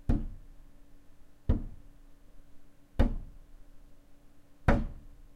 wood hit
Hitting a piece of wood
hit, thump, wood